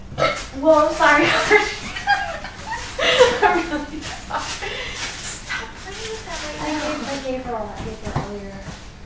Isolated burp and apology from a recording session.